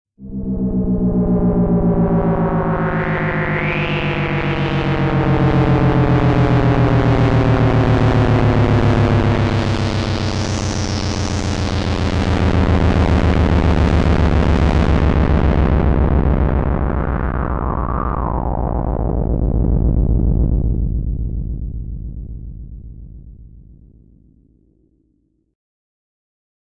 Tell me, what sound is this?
A drone sound i created using a DSI Mopho, recorded in Logic.